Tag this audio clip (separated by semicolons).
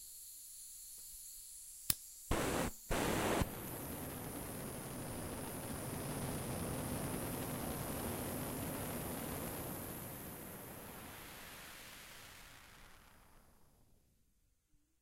engine; fire